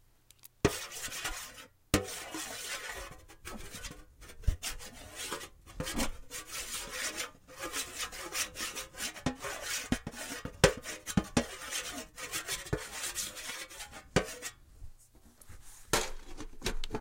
rubbing a marker over the lid to a cookie tin to make a swirling metal scraping noise.